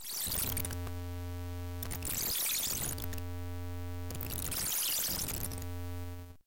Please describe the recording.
Machine Switching Channels 01
Rustic old tuning or switching channels.
Thank you for the effort.